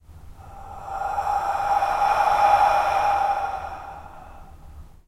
I needed some spooky sounds for my Dare-16 entry.
Recorded some breathy sounds. I used them with lots of reverb, but here are the dry versions, so you can apply your own effects.
Zoom H1, built in mics.